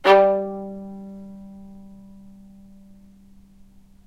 violin spiccato G2
spiccato, violin